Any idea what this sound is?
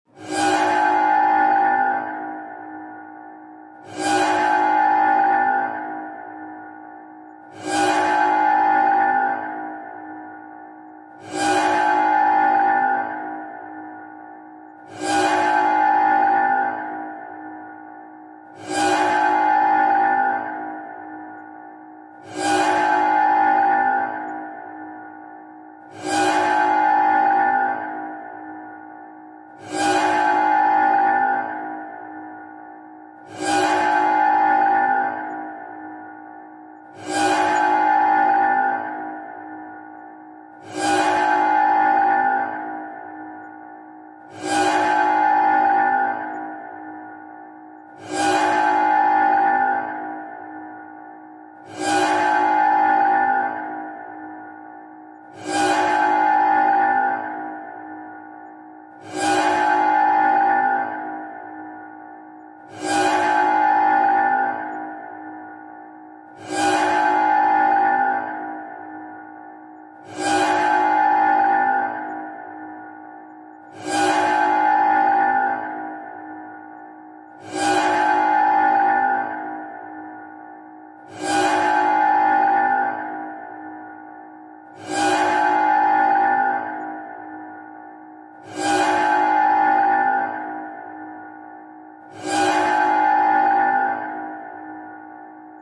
Create Alarm/Klaxon 1 sound from brainclaim's "saw-cutting" sound. Use Audacity:
• Cut from 3.678s to 4.696 (end)
• Select from 2.023s to 3.678 (end)
- Effect→Adjustable Fade
Fade Type: Fade Up
Mid-fade Adjust (%): 0.0
Start/End as: % of Original
Start (or end) 100
Start (or end) 100
Handy Presets (override controls): Exponential Out
- Effect→Repeat
Number of repeats to add: 25
Tiếng Báo Động
alarm, danger, klaxon, warning